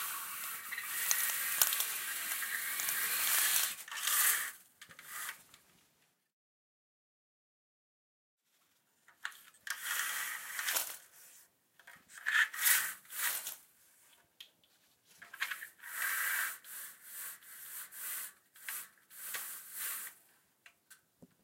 Blinds of windows are being drawn open and shut.